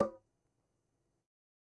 Metal Timbale closed 019
home, trash